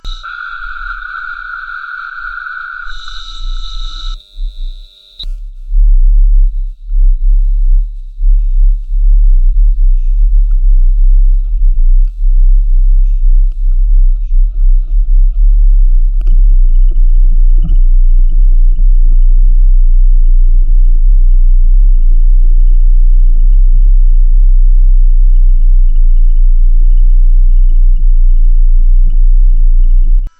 Recording ship main Engine is tricky,because the machine hall is filled by noise from a lot of diesel Engines e.g. Electric Power Whatever ou try, you cannot record to get same effects as you hear waking around on a big ship. I placed the microphone Close to thhe combustion tube system. The 38,000 HP Engine belongs to 200 meters long M/S Petunia.